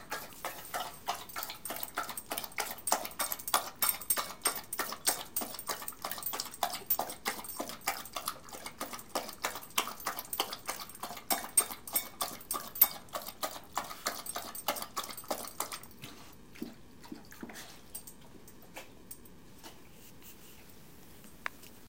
A dog drinking water after a walk. Tags clinking bowl.
clank, dog, drinking, tags, water